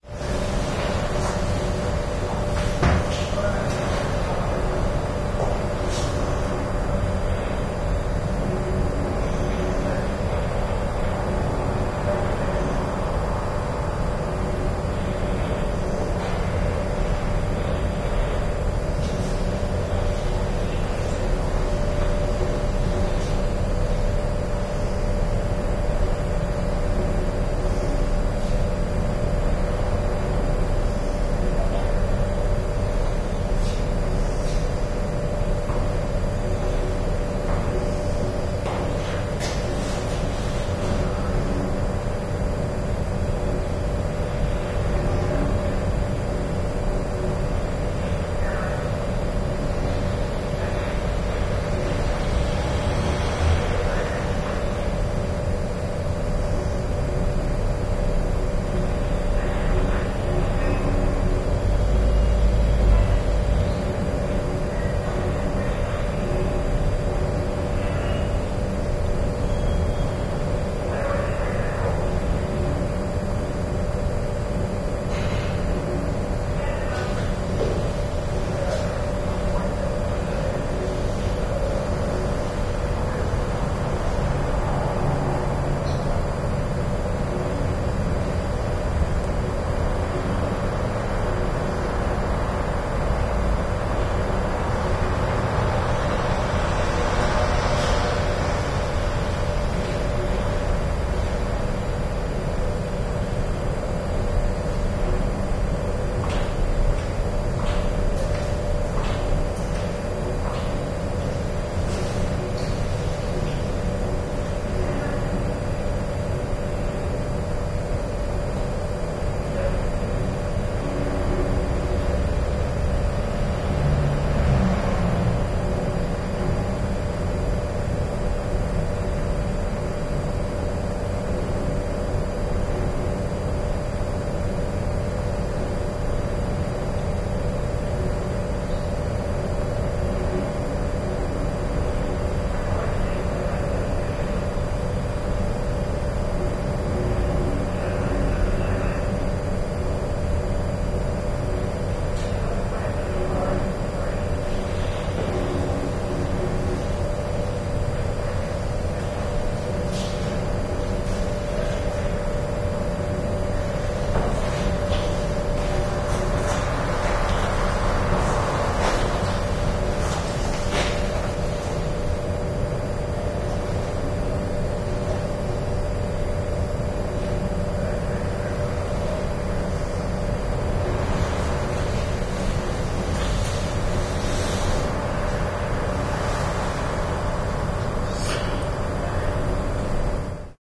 Police Station Atmosphere 2 - Very distant rt voices - aircon - traffic.